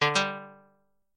Pickup Sound
A brief "pickup" or "get" sound effect, which could be played upon acquiring an item or perhaps pressing a menu button. Made in Audacity.
short, ding, menusound, gamesounds, beep, get, buttonsound, bloop